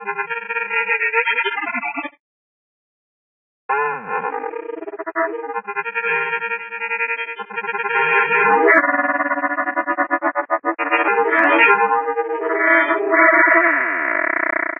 granular synthesizer feuertropfen
This is a processed waveform of a soundeffect. I made it with fruity loops granulizer. Enjoy :)